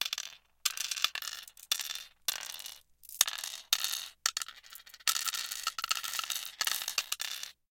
Glass mancala pieces being dropped into a wooden board.
clatter, game, glass, mancala, wood